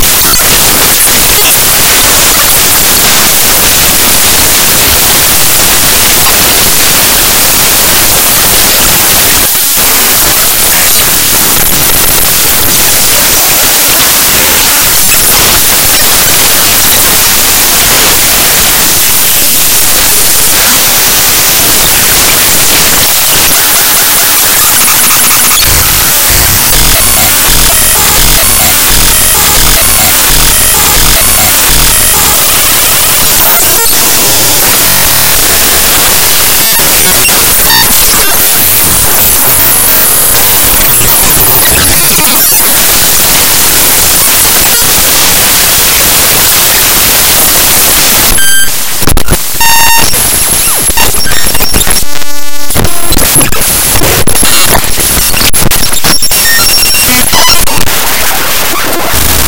This is the first glitch sound of 2021! It's short, and glitches and has static.